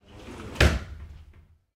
window slide closed slam thud wood glass